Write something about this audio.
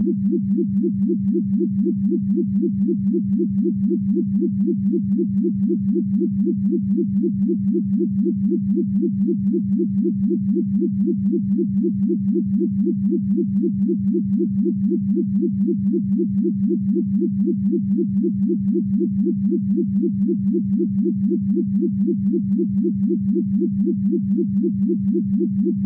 Sci Fi Machine 0
Repeat BMacZero's laser 100 time for create cool machine sound. Right track shift 0.009 sec forward.
ambience, macine, sci-fi, hum